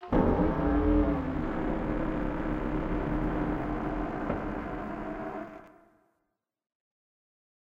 Sound of stretching arm created with a hydraulic cylinder.